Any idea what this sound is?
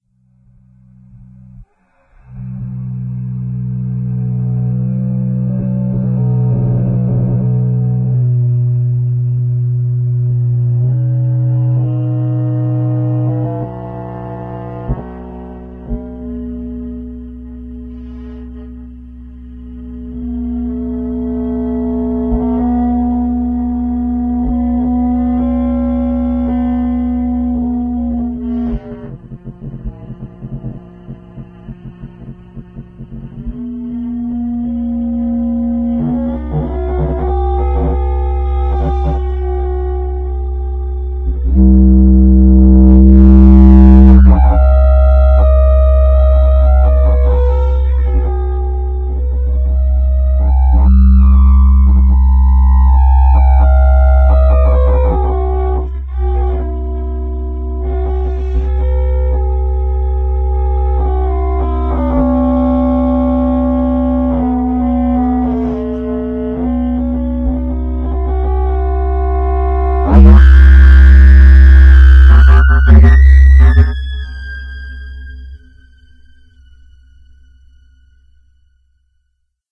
A long and low clarinet tone processed by Granulab. The character is changed dramatically resulting in a moving tone with dramatic and cinematic quality. You have to listen through it and use the parts that suit best for a particular scene.